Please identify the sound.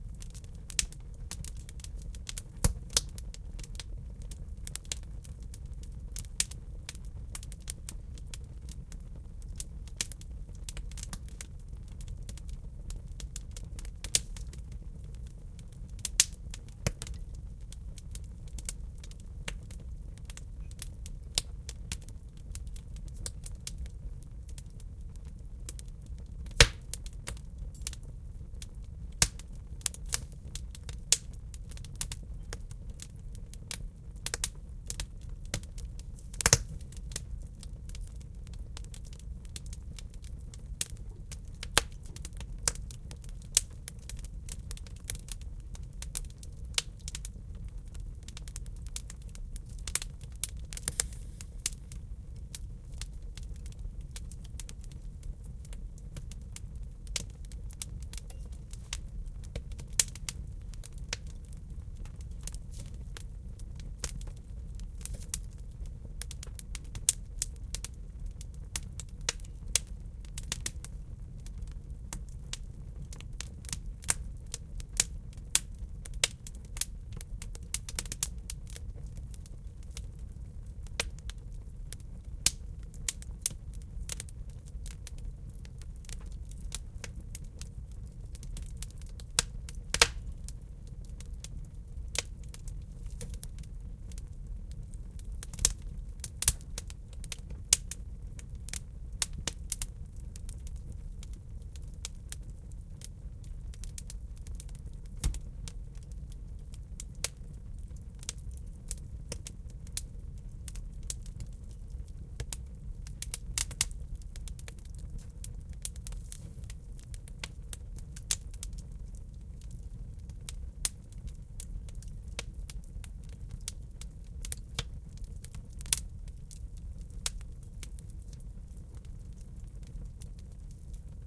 fire in fireplace close1
close, fireplace